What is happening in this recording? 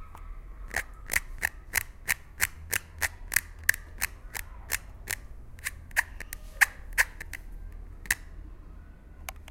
mySound AMSP 02
Sounds from objects that are beloved to the participant pupils at the Ausiàs March school, Barcelona. The source of the sounds has to be guessed.
AusiasMarch, Barcelona, CityRings, mySound, Spain